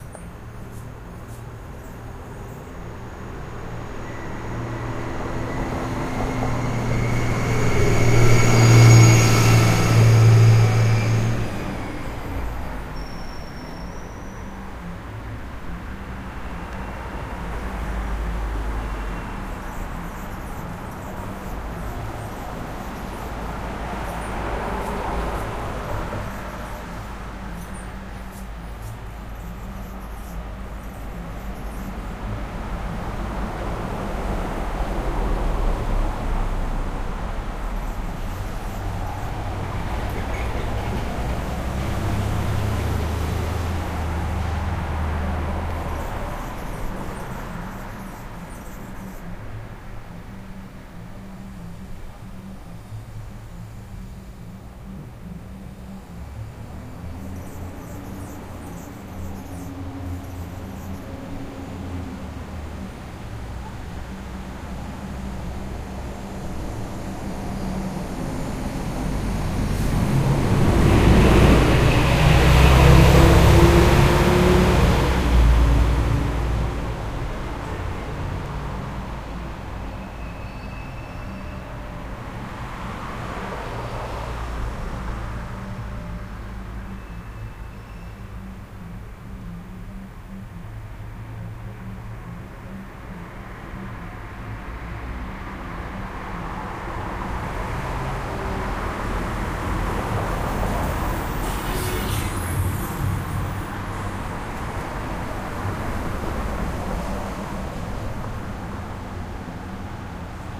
Haight St. Hummingbirds
Hummingbirds at our feeder overlooking Haight Street as traffic floats by three floors below on a sunny afternoon. Birds become audible after the MUNI bus passes by.